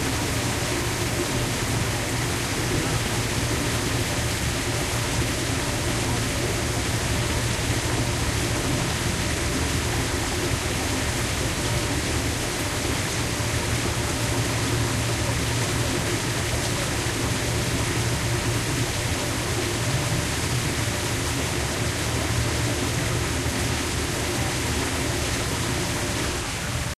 zoo morewaternoises
Walking through the Miami Metro Zoo with Olympus DS-40 and Sony ECMDS70P. More noises of water.